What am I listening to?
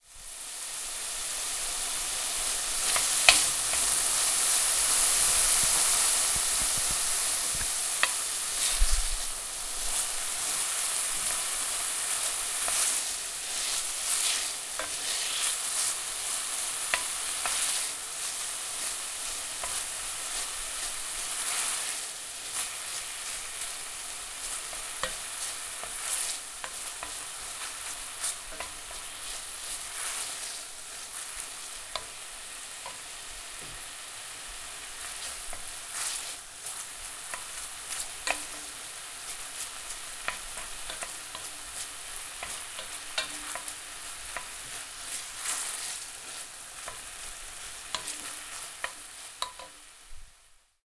Sound of frying bacon in a pan. Sound recorded with a ZOOM H4N Pro.
Son de lardons en train de cuire dans une poêle. Son enregistré avec un ZOOM H4N Pro.
frying, bacon, frying-bacon, cooking, pan, fry, yummy, meat, kitchen, cook